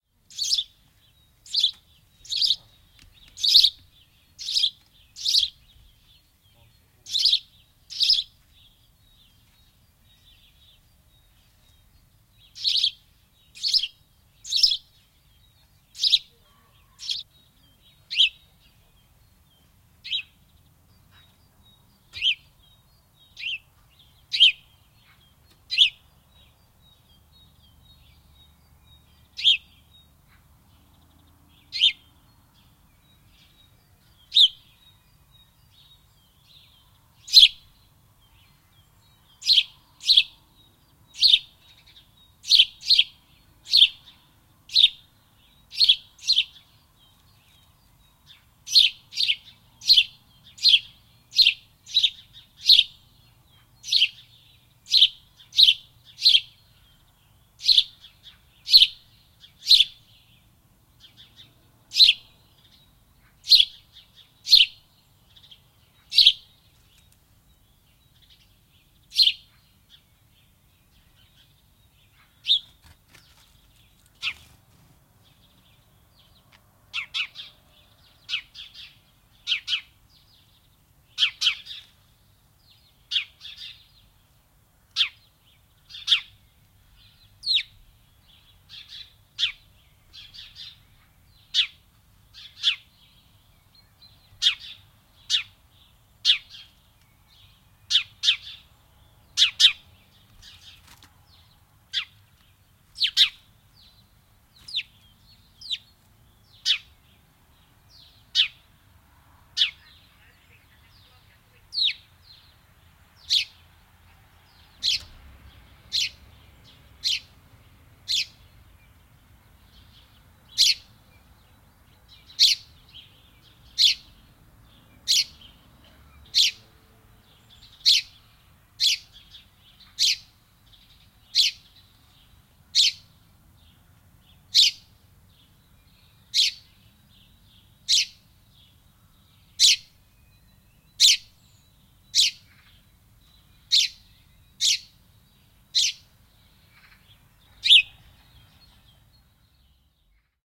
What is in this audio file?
Varpunen, viserrys / Sparrow chirping, twittering in a tree, some distant birds in the bg (Passer domesticus)
Varpunen visertää, sirkuttaa puun oksalla. Taustalla vähän etäisiä lintuja. (Passer domesticus).
Paikka/Place: Suomi / Finland / Nummela
Aika/Date: 1986
Field-Recording, Soundfx, Tehosteet, Twitter, Lintu, Suomi, Birds, Sirkutus, Viserrys, Cheep, Spring, Linnut, Finnish-Broadcasting-Company, Bird, Yleisradio, Yle, Varpunen, Chirp, Finland